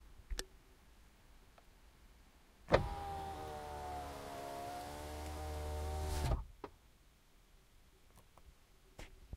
Car window up
Electric car window going up.
car, carwindow, window, windshield